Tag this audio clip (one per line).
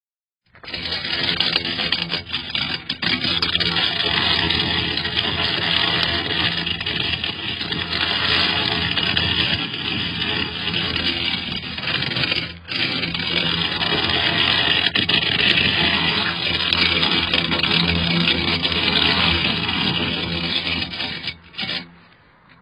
grinding
scrape
plastic-tube